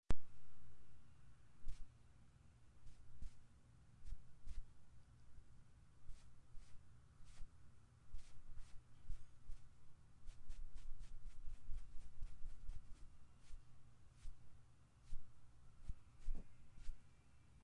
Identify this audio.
Makeup brush skin
makeup brush on skin
makeup, clothing-and-accessories, sounds, brushing, skin